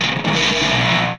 Some Djembe samples distorted